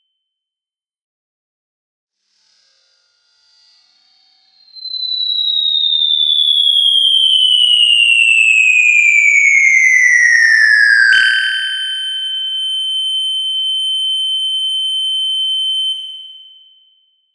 A high-pitched whistle sound that gradually decreases in pitch to simulate an object falling from a great height. At the end, it changes to a noise that could best be described as a "glisten" or some kind of sound from an anime. Created using AniMoog for iOS.